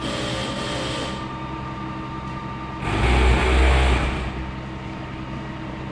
Machine loop 06
Various loops from a range of office, factory and industrial machinery. Useful background SFX loops
factory, loop, machine, machinery, office, plant